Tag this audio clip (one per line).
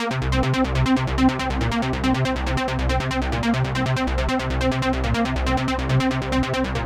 progression
synth
phase
140-bpm
bassline
techno
distorted
bass
sequence
trance
pad
beat
melody